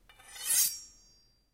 metal-blade-friction-3
metal metallic blade friction slide